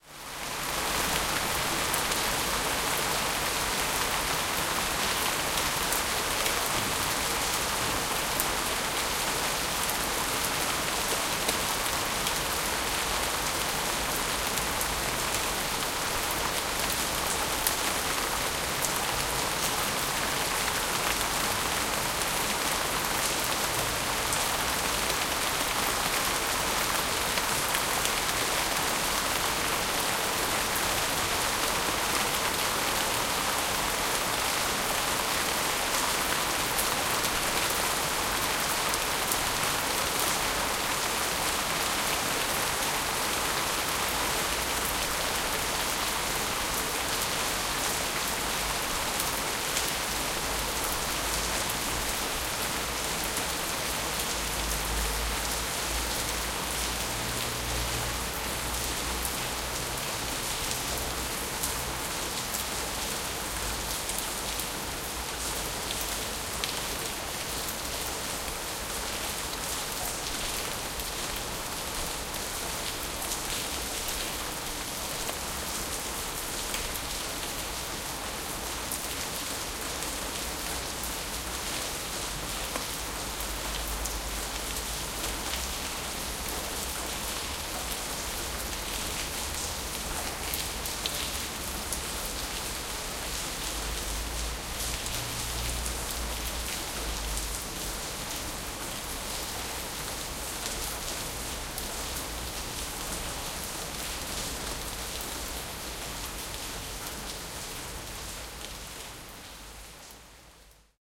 rain slowing down
rain, nature, weather